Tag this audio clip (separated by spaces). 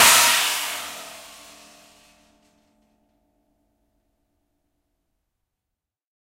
broken chain china crash cymbal drum one-shot sample trash